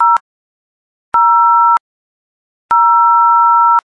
The '0' key on a telephone keypad.